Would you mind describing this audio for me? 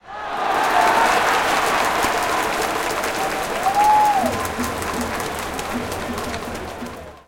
nagoya-baseballregion 23
Nagoya Dome 14.07.2013, baseball match Dragons vs Giants. Recorded with internal mics of a Sony PCM-M10
Ambient, Crowd, Baseball, Soundscape